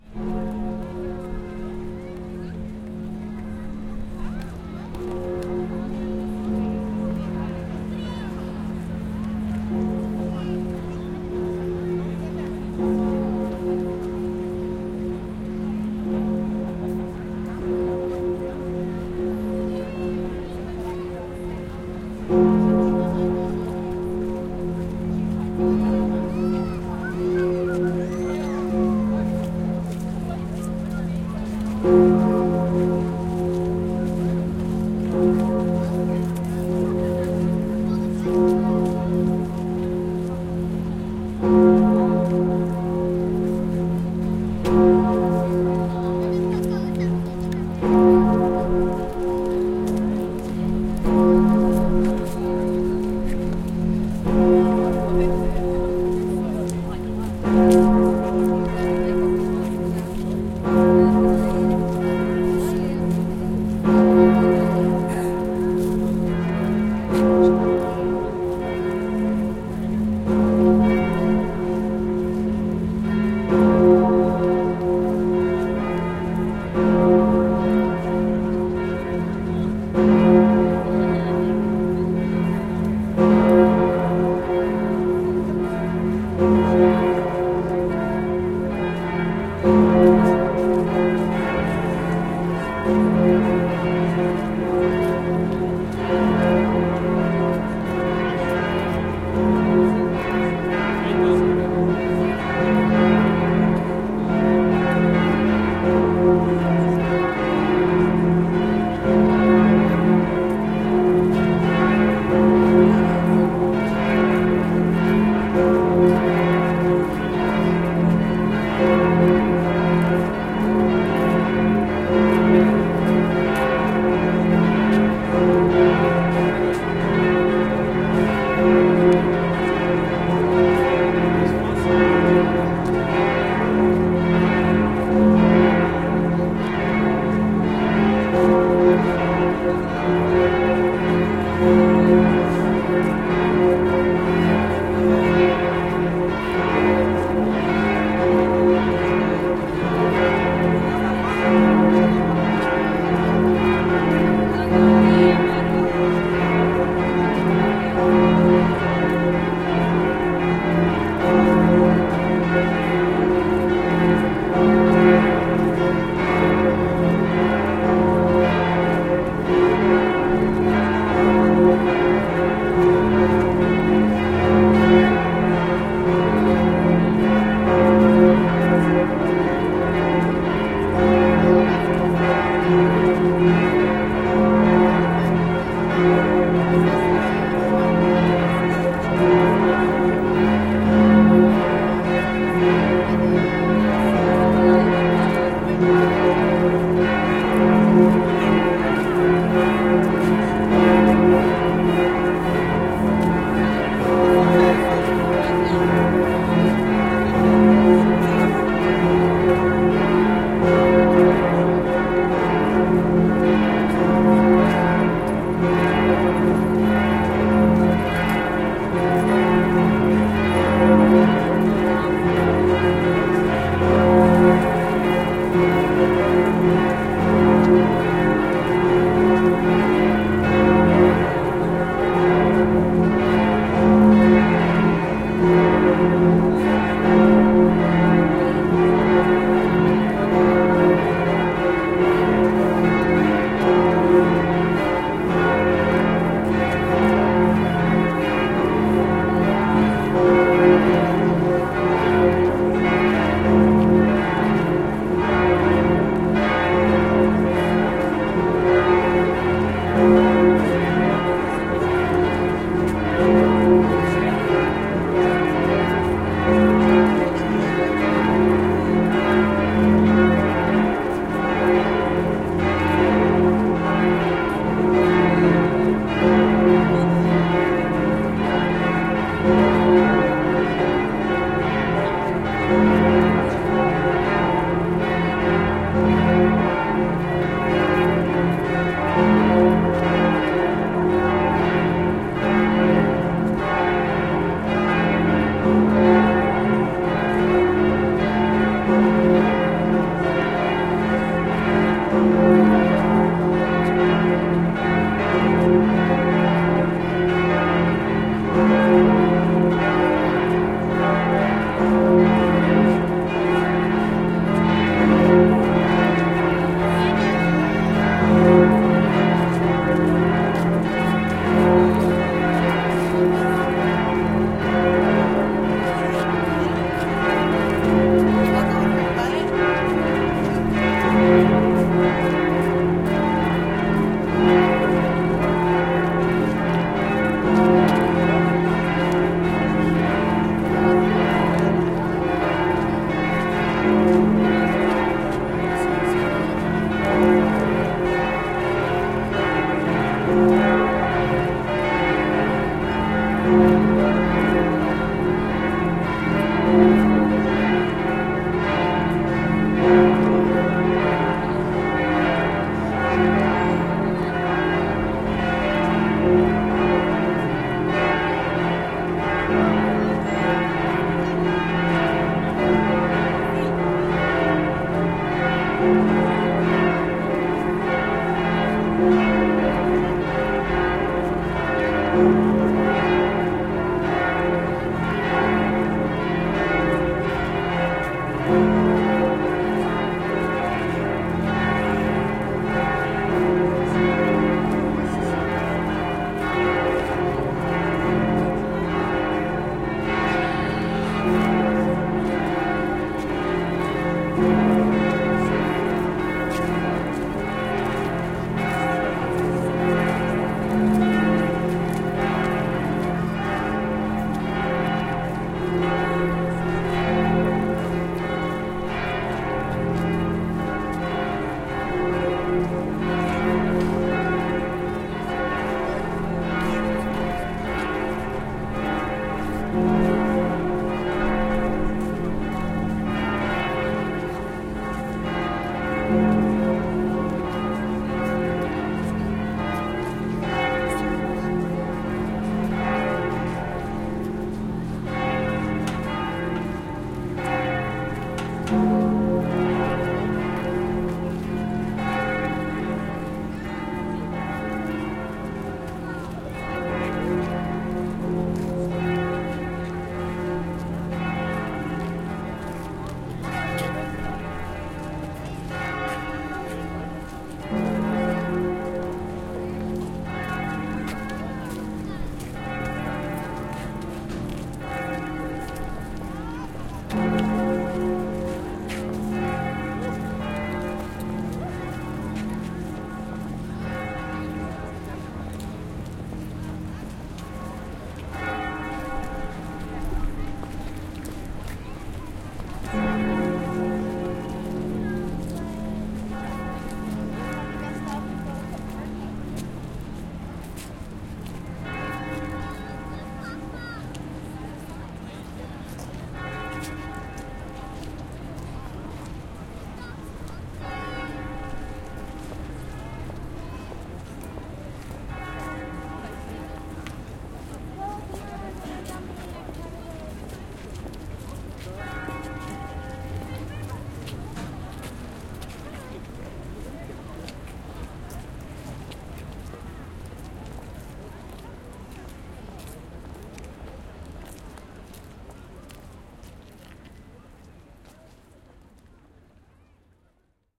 Bells ring to mark the start of evening mass at Notre-Dame Cathedral (Notre Dame de Paris) in Paris, France on Christmas Day in 2011. The first bell heard is the largest, the "Emmanuel" bourdon bell, which weighs 13 tons. After 90 seconds or so, the other bells in the north tower start to ring as well. After several minutes of continuous ringing, the bells are allowed to slowly stop ringing.
A few soft clicking noises right at the start of the recording come from me adjusting the recording level (which I couldn't do until the bells started to ring).
recorded with Zoom H4n built-in mics hand-held, about 100 feet southwest of the south tower (the one with the big bell)